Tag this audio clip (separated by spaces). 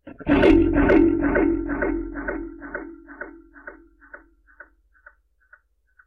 hit spring noise contact rubber-band analog